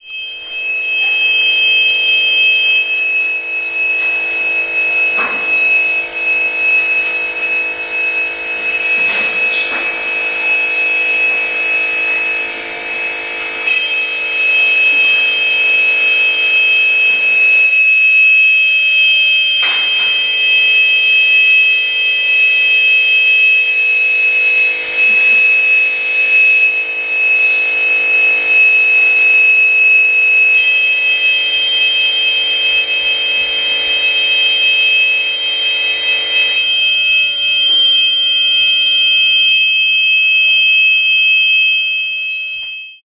19.07.2010: about 20.00. In the Arsenal Gallery during the International Choreographic Performance Festival Serendypia. The awful sound from Gilad Ben Ari's performance. When he stood sounding when he was in the vertical position the sound were disappearing.
performance-festival, performance, squel, high-frequency, poland, field-recording, poznan